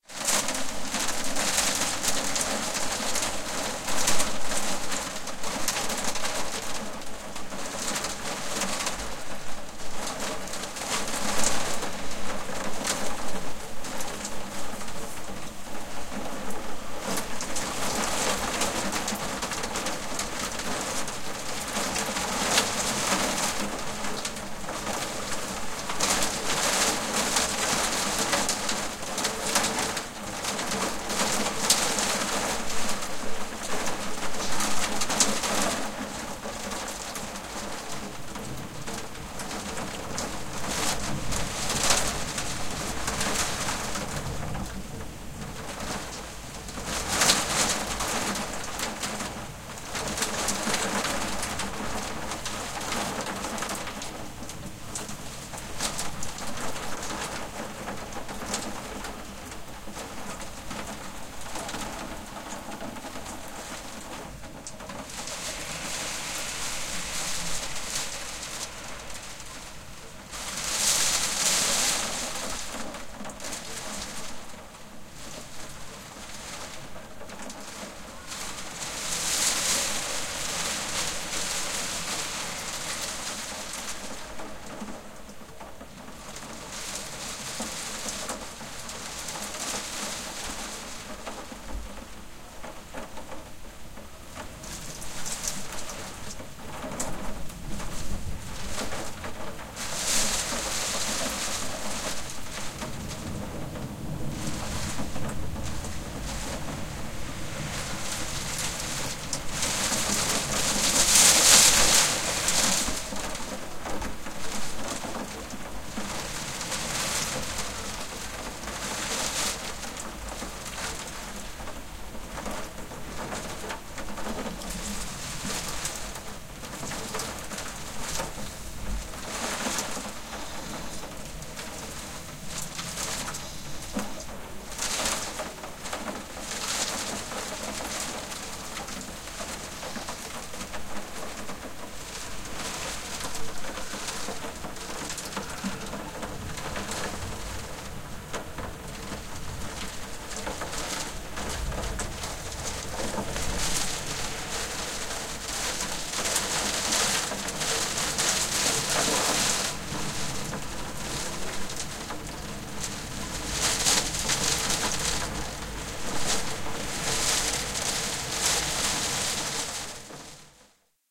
kroupy na okně
The Storm: Hail face on the window glass (recorded inside)
Card Recorder M-Audio + his original stereo electret microphones